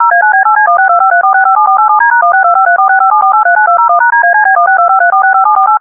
dtmf tones phone telephone